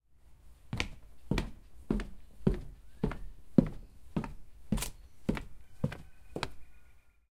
footsteps - wood 01
Walking across a wooden deck with the microphone held to my feet.
wood, deck, outdoors